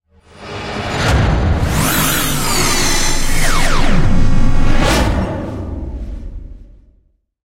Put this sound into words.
Morph transforms sound effect 3
game, impact, hit, dark, woosh, destruction, transformer, morph, atmosphere, transition, cinematic, abstract, moves, horror, Sci-fi, background, scary, stinger, rise, futuristic, noise, drone, opening, metal, transformation, glitch, metalic